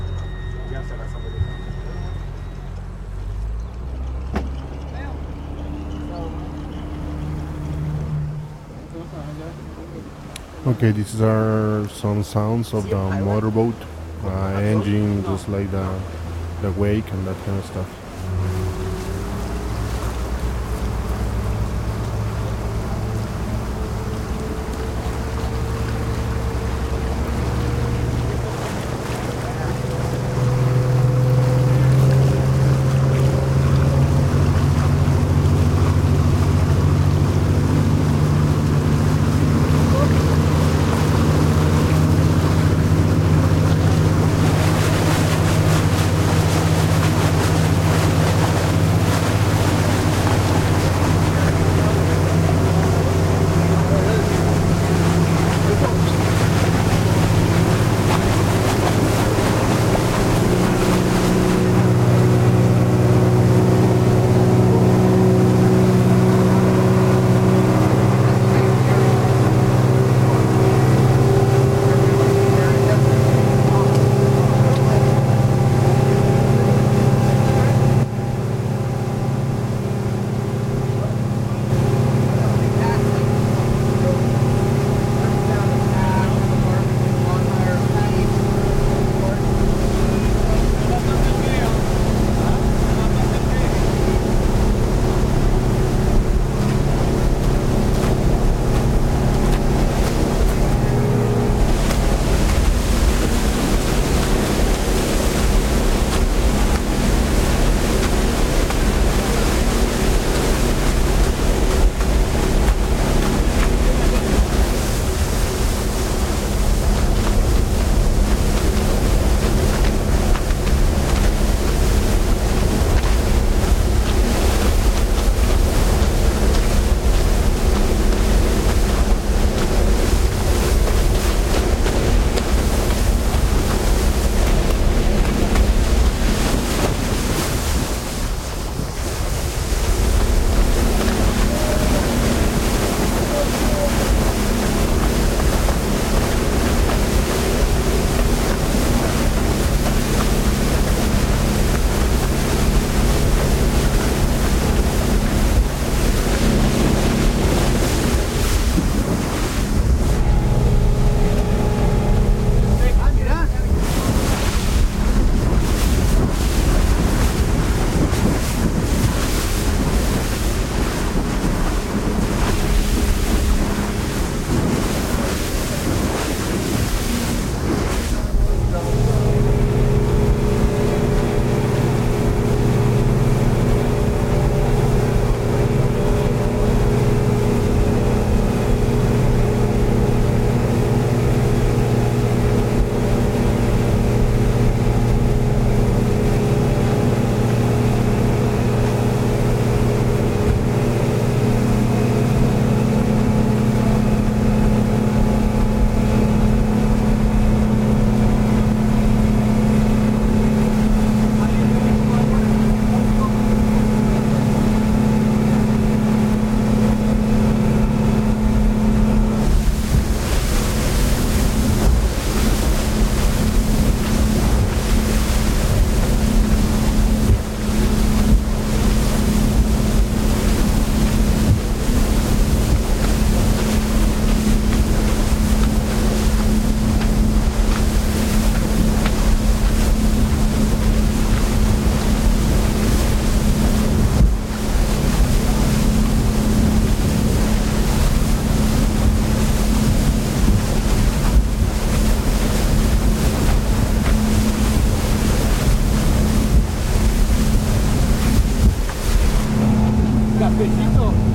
diferent perspectives of a moving speedboat, engine, side, front, waves beneath the boat, recorded with a sennheiser 416 on a zaxcom Deva V